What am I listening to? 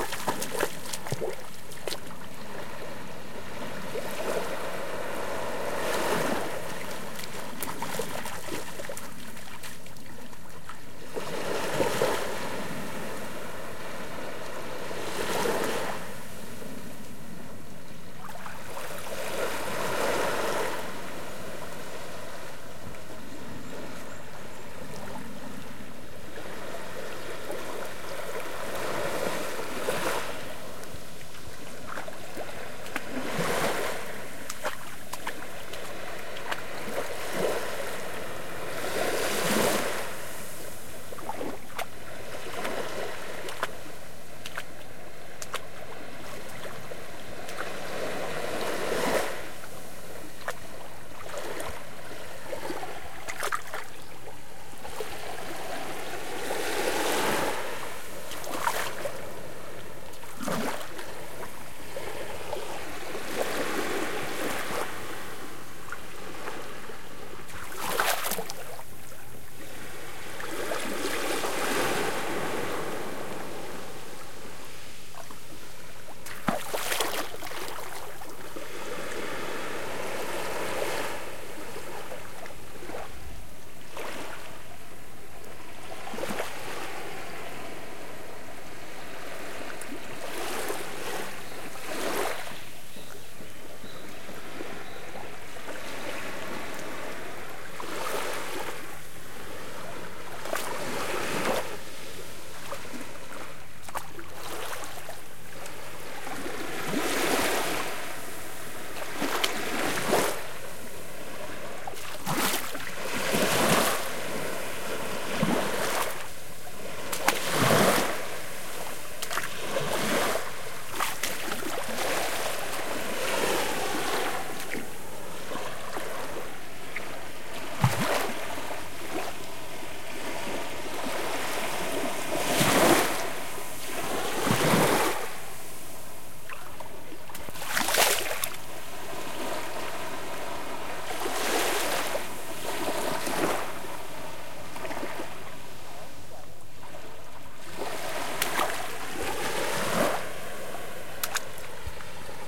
Recording taken in November 2011, at a beach in Ilha Grande, Rio de Janeiro, Brazil. Recorded with a Zoom H4n portable recorder.
Sounds of walking and splashing on the water. Maybe some of the splashes can pass off as someone swimming...